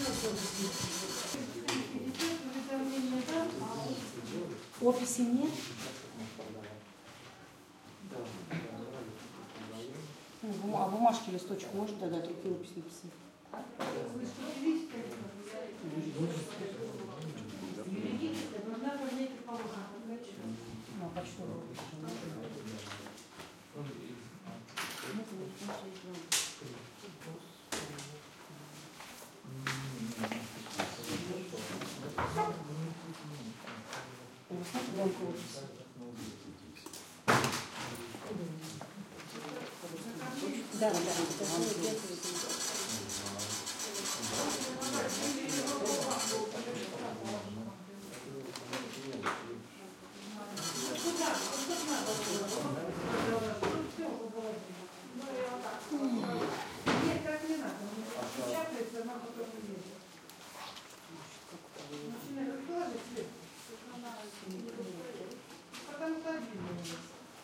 110416 03 Russian Post Office
Russian Post Office